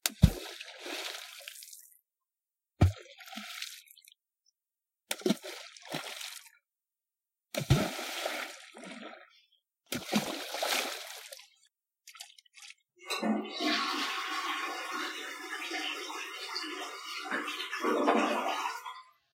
I threw some rocks in lake Camanhe while on vacation. they make sum sweet splashy sounds :) plush my toilet at the end